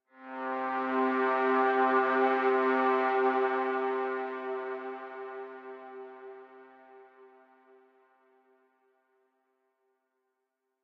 C Space trumpet
Brass, space, creepy, sweep, dark, atmosphere